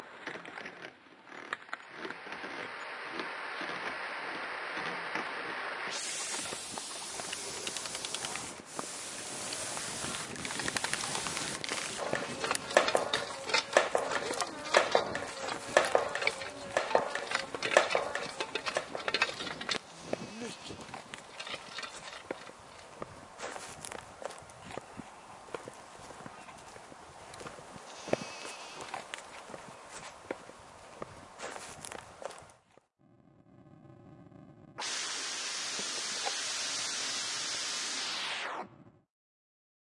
A sonic post card from Hailuoto / Finland